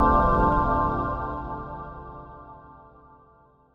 a hit with sustain